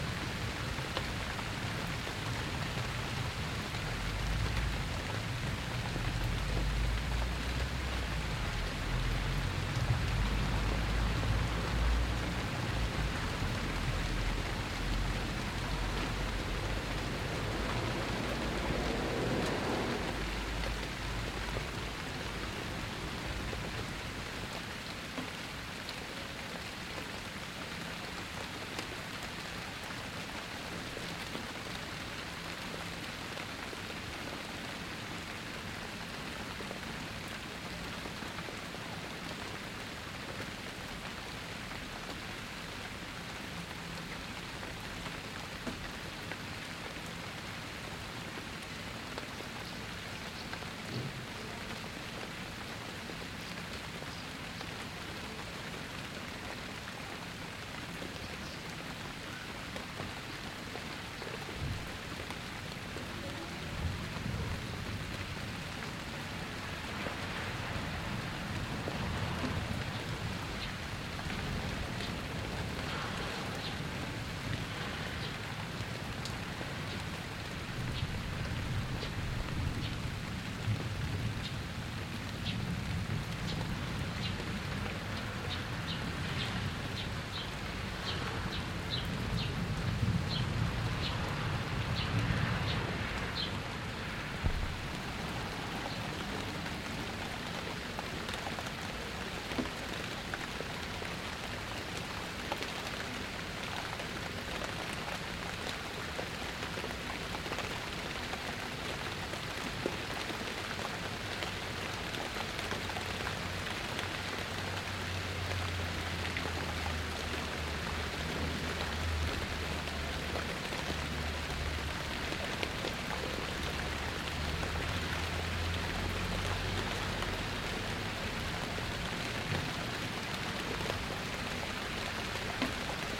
Soft rain with background noises
Recorded on Canon XF100 Camcorder with RODE NTG2 microphone
noise, ambiance, city, background, field-recording, ambient, rain, ambience, soft, soundscape, atmosphere, suburbs, background-sound